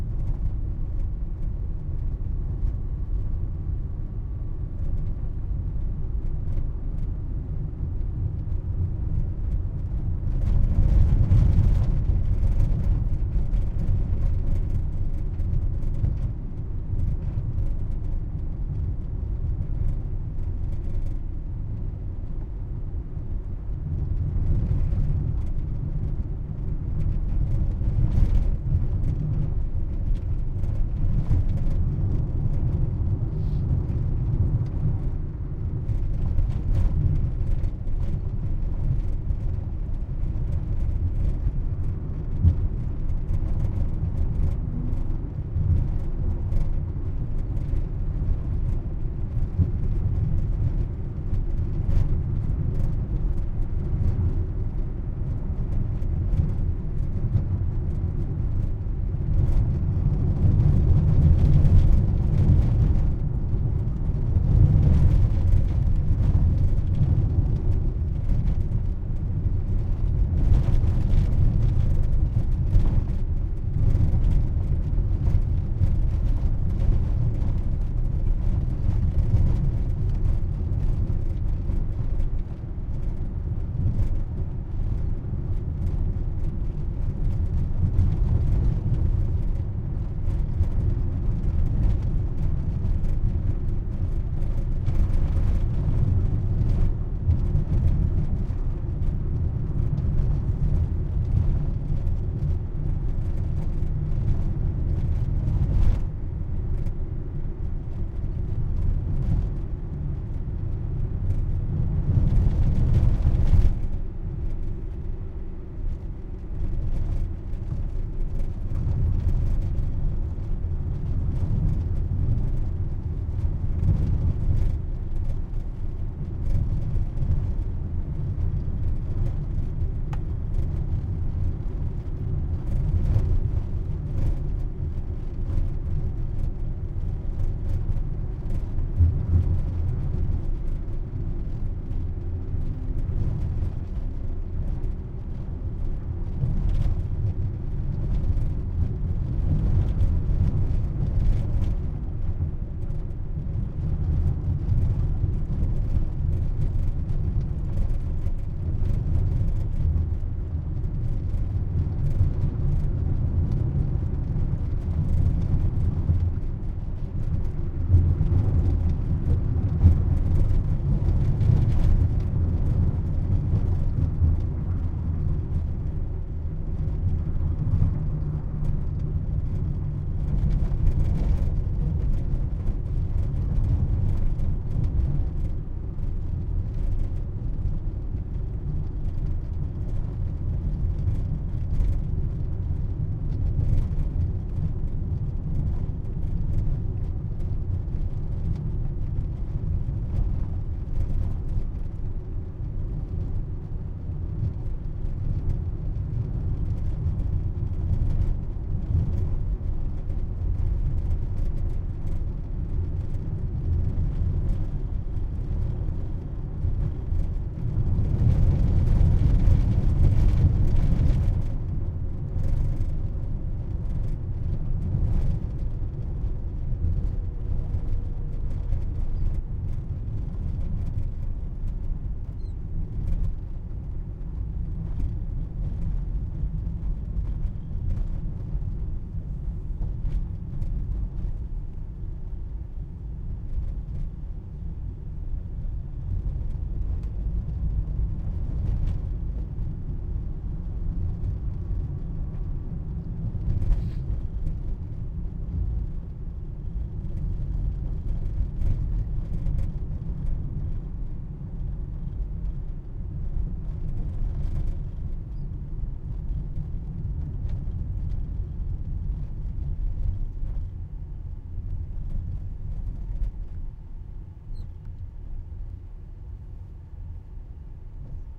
auto int real rattly driving bumpy road medium speed 40kmph rear
40kmph, bumpy, driving